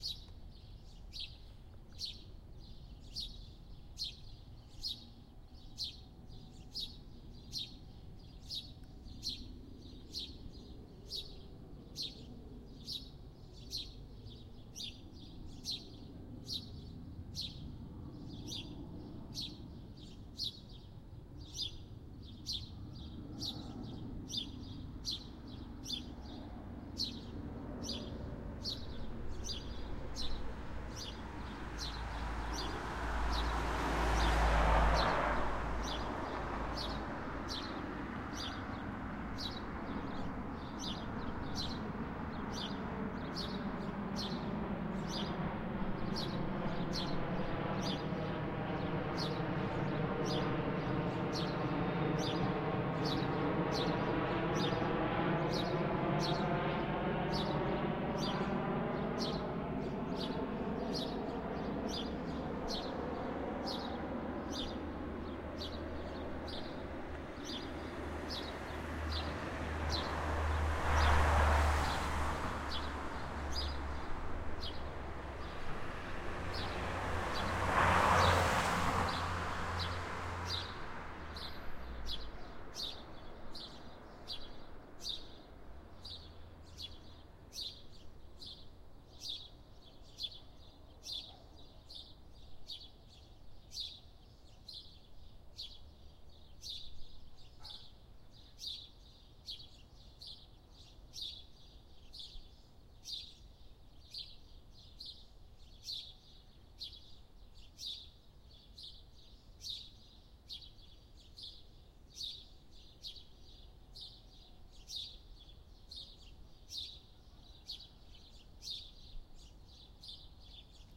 Porto, Portugal, July 19th 2009, 06:00: Morning mood at the riverside along the Douro river. Several birds are singing, one of them is heard distinctly. Then a few cars and an airplane pass by slowly.